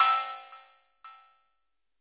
Delayed melodic mallet highpassed 115 bpm E5
This sample is part of the "K5005 multisample 03 Delayed melodic mallet highpassed 115 bpm"
sample pack. It is a multisample to import into your favorite sampler.
It is a short electronic sound with some delay on it at 115 bpm.
The sound is a little overdriven and consists mainly of higher
frequencies. In the sample pack there are 16 samples evenly spread
across 5 octaves (C1 till C6). The note in the sample name (C, E or G#)
does indicate the pitch of the sound. The sound was created with the
K5005 ensemble from the user library of Reaktor. After that normalizing and fades were applied within Cubase SX.
reaktor, multisample, mallet, electronic, delayed